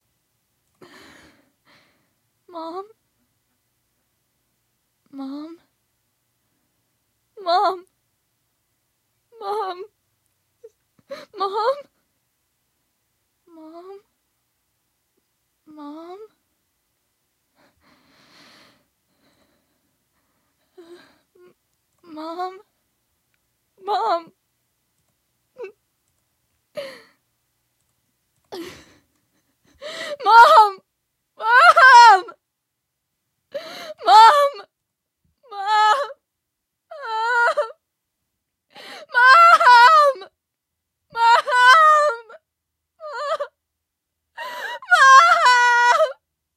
Female horror cries: crying mom
acting, cry, crying, emotional, female, game, horror, hurt, mom, sad, scared, scary, scream, screaming, shout, tears, upset, voice, whisper, worried